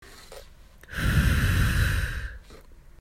Smoking and letting the smoke out.